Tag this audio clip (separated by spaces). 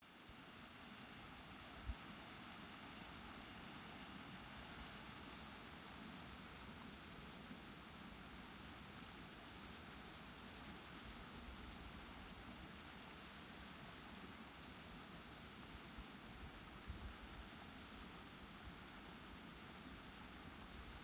crickets field-recording summer